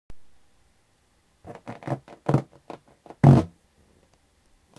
romper esplotar arrancar